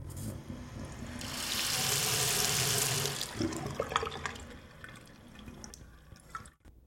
Water down the drain, take 2.